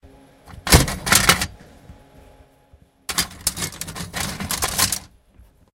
Alluminium Parts Moving
Sounds of few alluminium cuts moving
Please check up my commercial portfolio.
Your visits and listens will cheer me up!
Thank you.
alluminium, box, cuts, field-recording, fields, metal, moving, parts, real